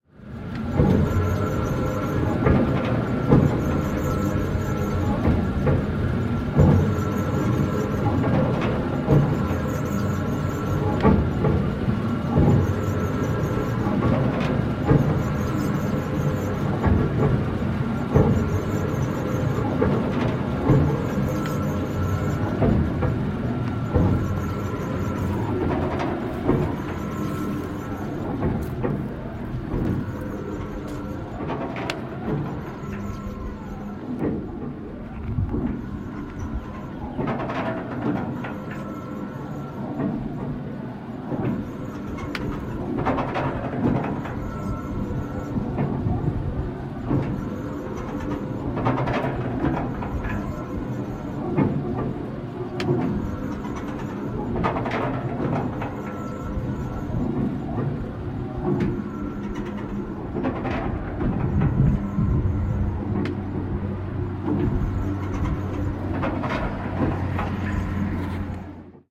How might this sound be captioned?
A small oil pump in Illinois rumbling and pumping oil. Heavy factory like machinery sound with lots of low end and repetitive clangs and rumbles. Reminds me of the soundscape of David Lynch's Eraserhead. Recorded at very close range with an iPhone 8. Very clean recording with no wind or other background noise.
rumble, oil-pump, heavy, oil-rig, machinery, factory, industrial, motor, machine, derrick